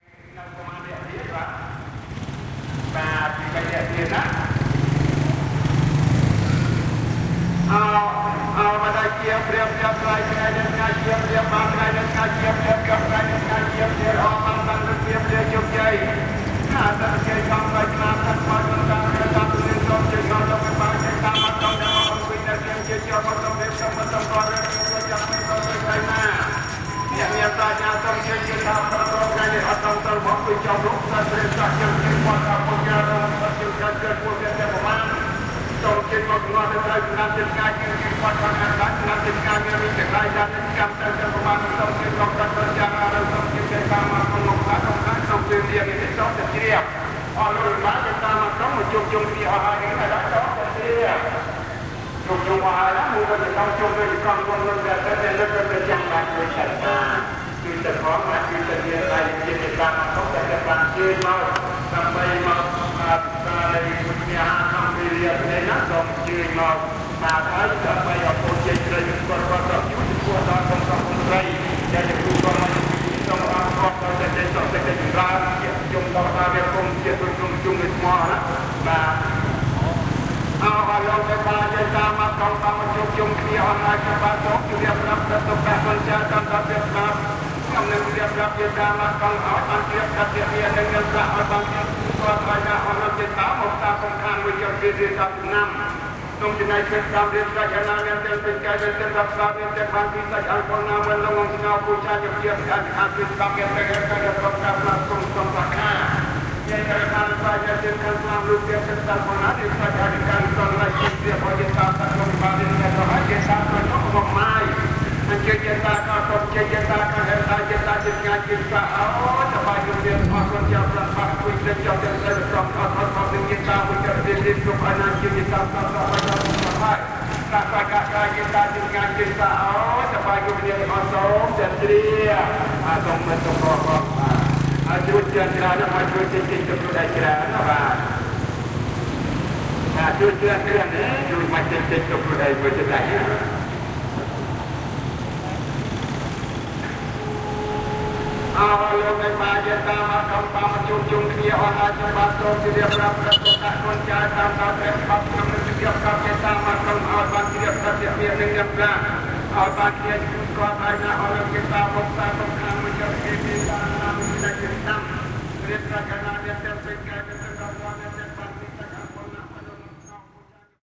Siem Reap Streetlife
This is a recoding of an amplified rooftop broadcast on the street in Siem Reap Cambodia, accompanied by the typical traffic noise.
mini-disc, A/D, sound forge